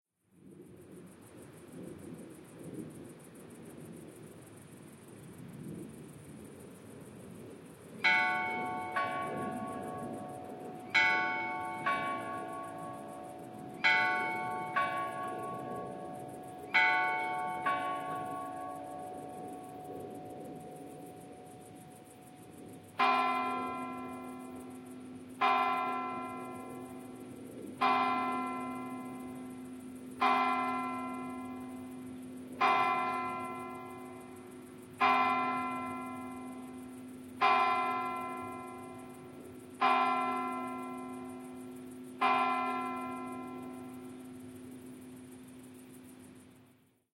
EXT Siguenza, Spain NIGHT: Cathedral church bells from the mountain
This is a recording of the Cathedral church bells in the historical town of Siguenza, Spain. This was recorded from the hilltop across from the town at 9:00pm in September 2019. The recording contains nature sounds, an airplane overhead, crickets, and the church bell sequence.
This is a clip from a longer recording that isolates the cathedral church bell sequence.
Recorded with Shure MV88 in Mid-side, converted to stereo.
travel, outside, background-sound, soundscape, bells, mountain, ambience, background, atmosphere, atmo, Spain, field-recording, night, atmospheric, ambient, church, Siguenza, ambiance, atmos, nature, cathedral, crickets